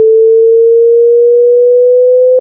Longer wailing alarm.
alarm, siren, wail